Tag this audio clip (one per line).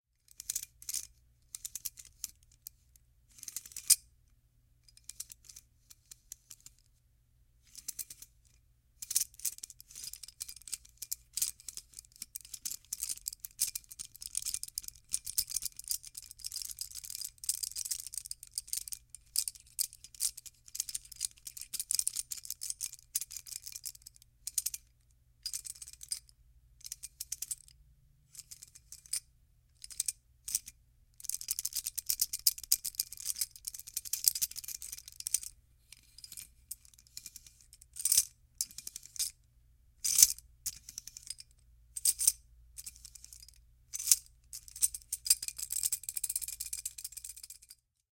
fx
gears
mechanical